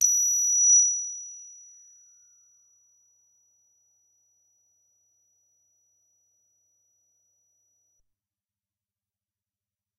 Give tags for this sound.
analogue cs80 C9 ddrm

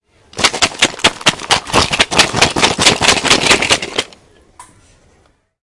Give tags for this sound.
belgium cityrings

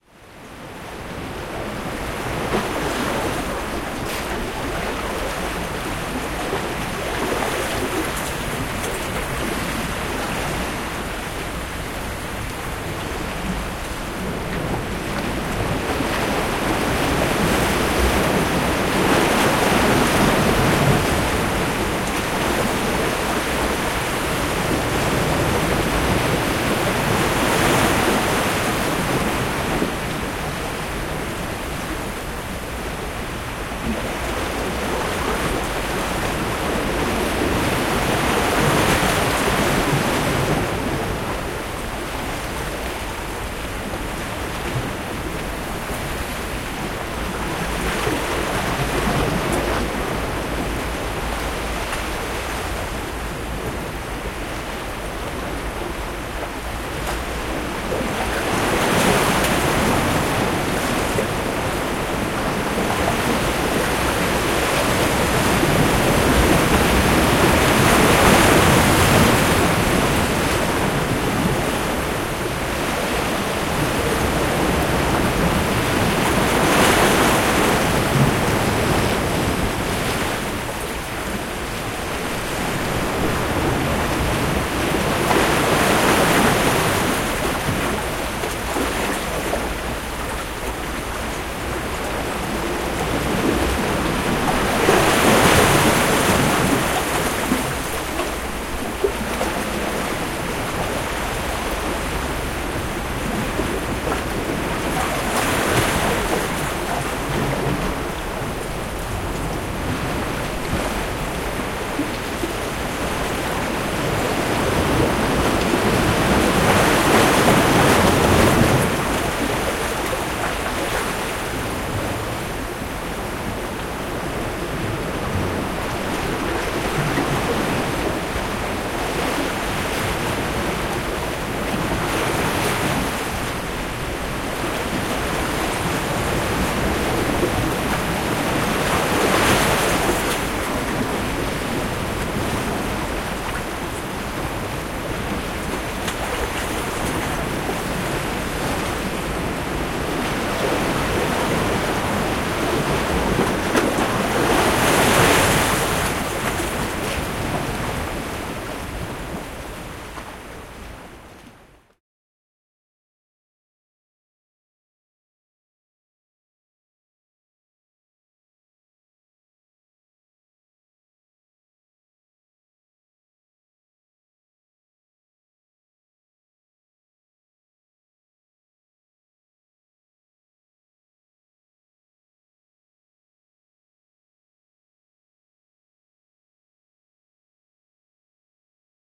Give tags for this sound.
ambience beach dock field-recording waves

